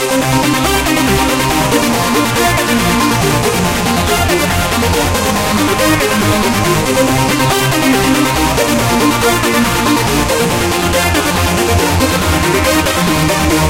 Trance loop.
Edited in Audacity.